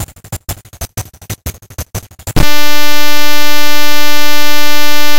Drumloops and Noise Candy. For the Nose
idm, drumloops, electro, rythms, glitch, electronica, experimental, breakbeat, sliced